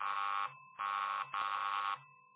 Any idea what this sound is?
Old Door bell SFX